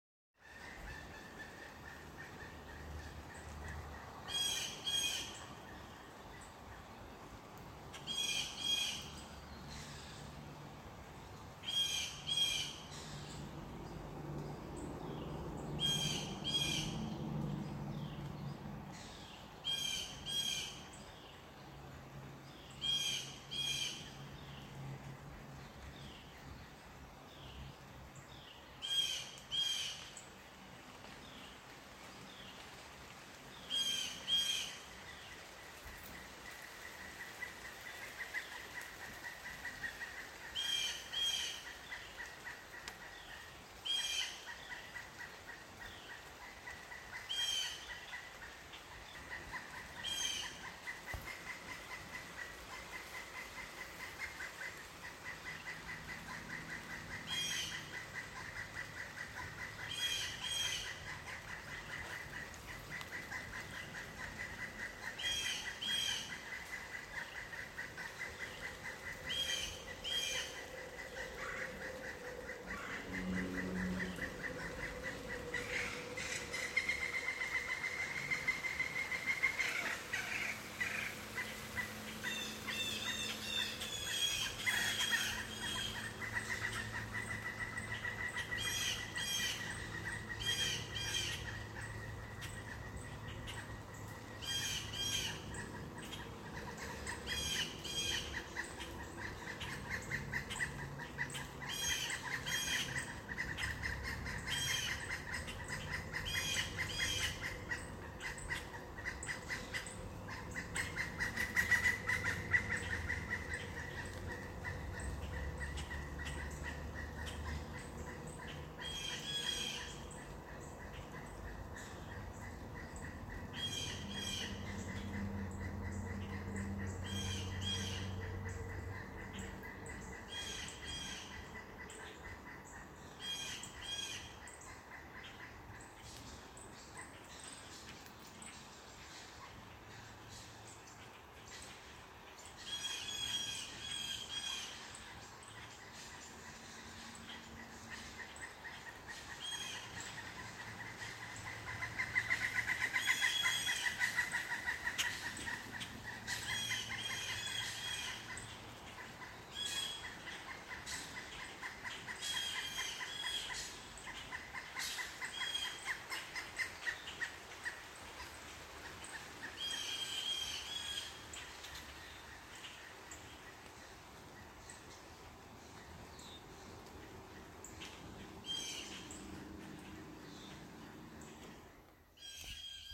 Birds Long
An unedited recording of birds outside of my window in the morning in Maryland.
field-recording, ambiance, birds